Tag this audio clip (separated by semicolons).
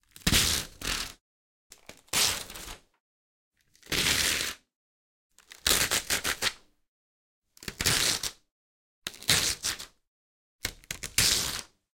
paper-rip; paper-tear; spiral-notebook; paper; rip; tear